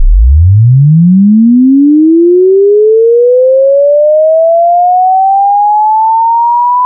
going-up-chirp
A sine wave, rising in pitch from around 100 hz to 1000 I think, not really sub-bass but a random one created in audacity while experimenting with frequencies, It just ended up in this pack :)
Maybe useful as a sound effect or loop as it is 4 bars in length at 140bpm
sine-wave, sine, high-pitch, rising-pitch, audacity, chirp